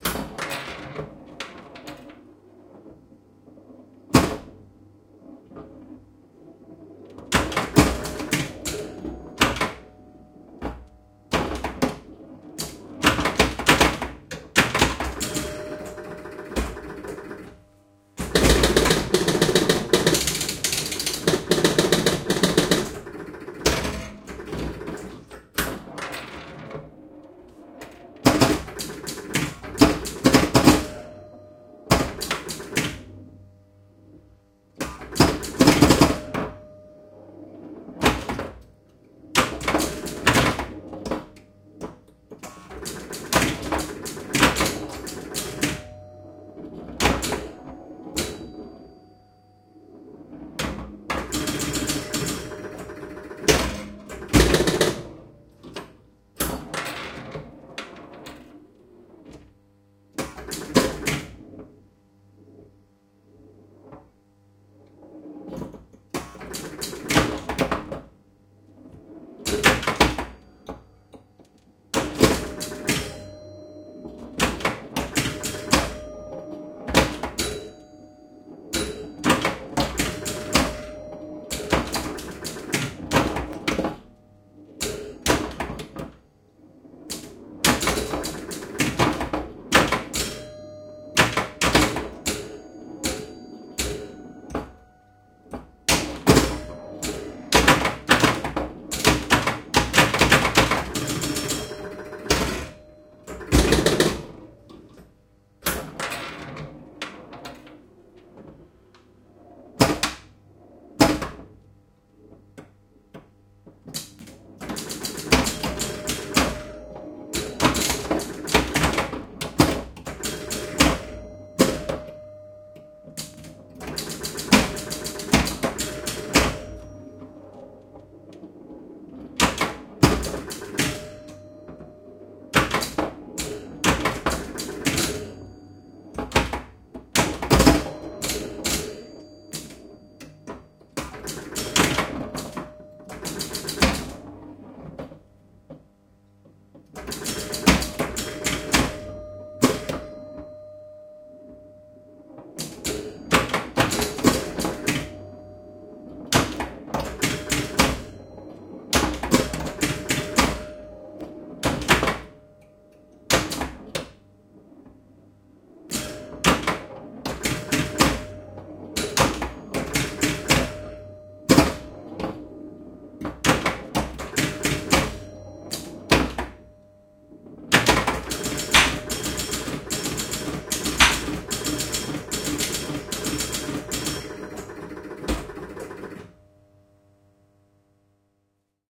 pinball-full game
Full game on a 1977 Gottlieb Bronco Pinball machine. Recorded with two Neumann KM 184 in an XY stereo setup on a Zoom H2N using a Scarlett 18i20 preamp.
arcade, Neumann-KM-184, flipper, Zoom-H2N, pinball, bronco, game, Focusrite, bar-athmosphere, plunger, gameroom, Scarlett-18i20, bumper, Gottlieb